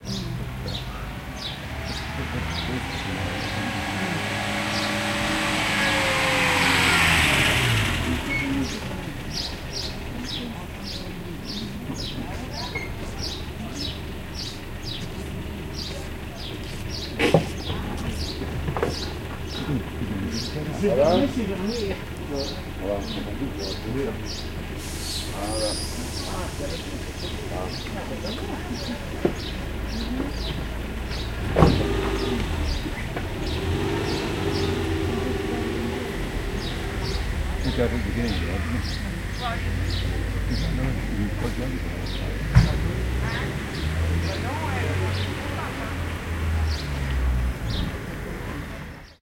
bormes 5 06 car f
A car passes very close, stops, the handbrake is applied, the door opens, driver steps out and speaks briefly to a villager before driving off. Quiet voices in the background. Recorded on minidisc in the beautiful French village of Bormes les Mimosas.
field-recording, handbrake